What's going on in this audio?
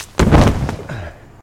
Body Hitting Mat
body falling to ground and hitting crash mat
falling, mat